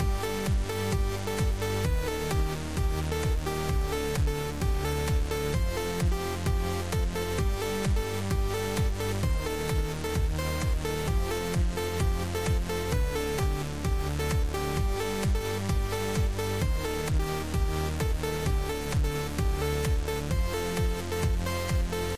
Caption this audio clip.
FL Amazing Melody Loop 3 Version2
amazing, tone, simple, fl, short, music, library, effect, cool, great